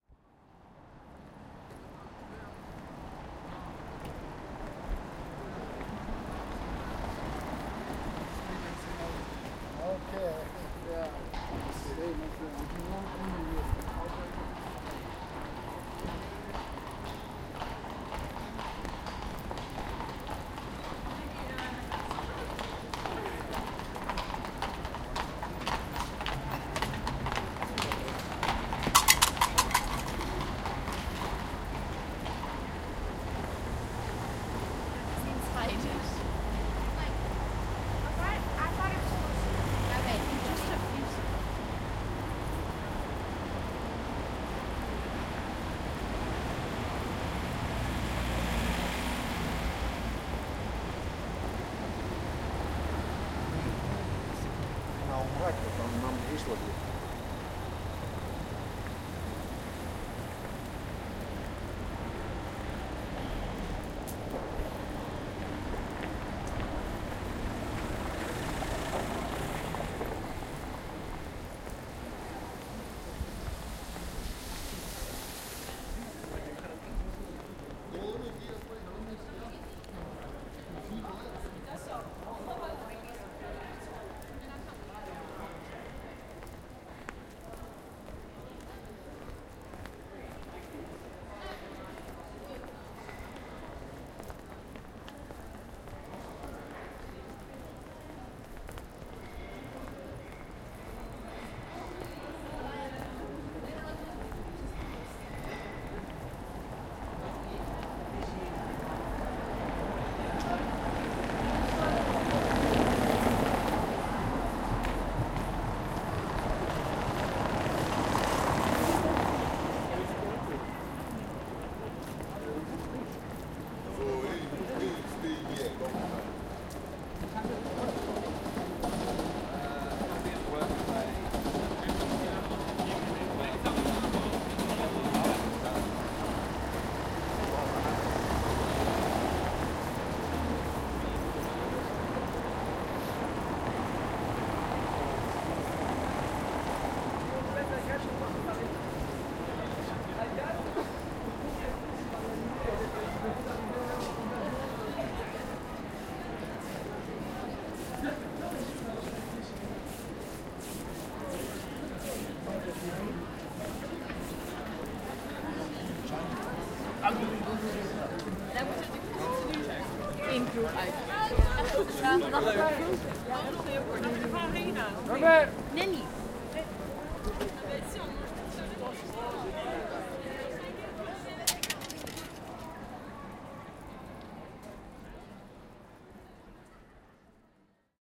Pague, Staro Mesto, City Center part.2
Prague city center recorded with Zoom H-1 on 21th December 2013.
praha, mesto, december, noise, markt, voice, prag, old, prague, city, atmosphere, staro, conversation, ambiance, town, tourists, ambience, walking, ambient, christmas, people, field-recording, center, street